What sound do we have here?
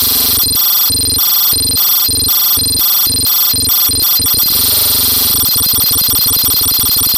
Computer Processing
modular, Noisemaker, element, CMOS